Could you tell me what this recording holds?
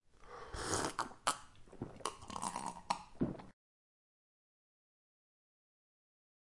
Man drinking noisily
canteen, CZ, Czech, drink, drinking, eating, food, Pansk, Panska, sip